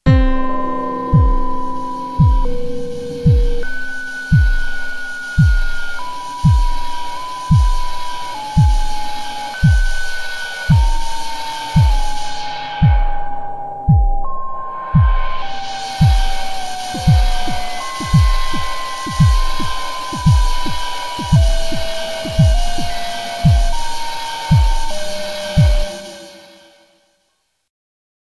Cool sound created on an old Korg NX5R sound module.